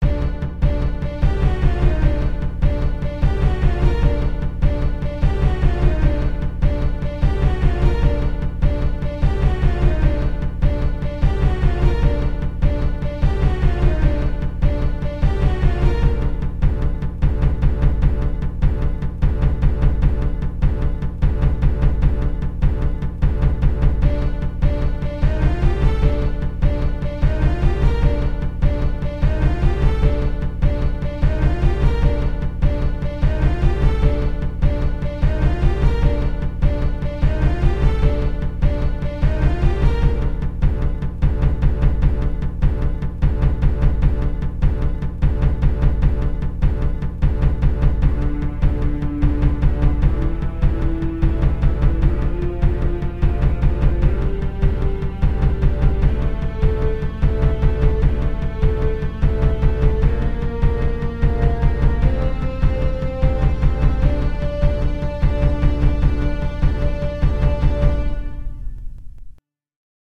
Minotaur (Chase Music)
Music made 100% on LMMS Studio.
Instruments: Strings, drums.
ACTION, CINEMATIC